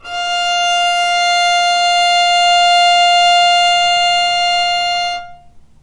violin arco non vib F4
violin arco non vibrato